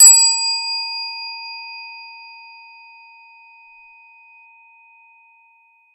This is a windchime recorded very quietly struck with a triangle beater with a fairly cheap microphone, noise removed, and normalized to max volume without distortion. Higher note of 2.